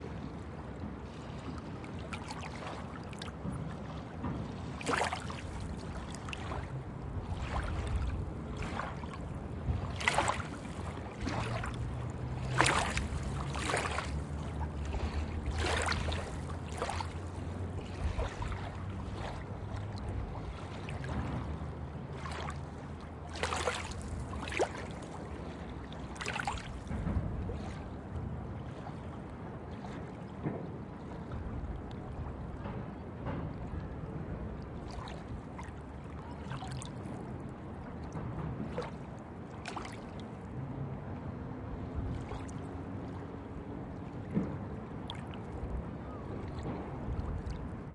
water surf under Leningradskiy bridge right-side 4
Surf under Leningradsky bridge on the rigth river-side near bridge substructure.
Recorded 2012-10-13.
Russia, surf, rumble, Omsk, 2012, bridge, noise